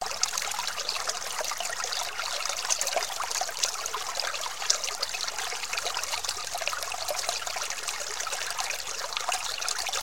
Creek 02 (loop)
Sound of a creek
you can loop it